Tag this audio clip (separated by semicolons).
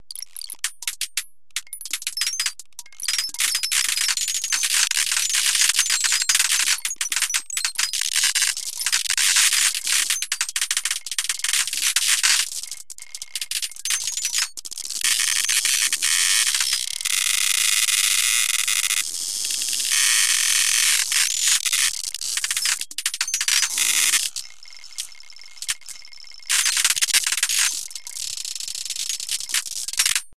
alien; annoying; computer; damage; data; digital; error; experimental; file; futuristic; glitch; laboratory; noise; noise-channel; noise-modulation; processed; random; sci-fi; sound-design